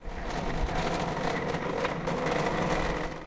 sound 2 - chair dragged
The sound of a chair being dragged along the floor.
Taken with a Zoom H recorder, near chair legs.
Taken in the UPF Poblenou plaza.
cafeteria, campus-upf, coffee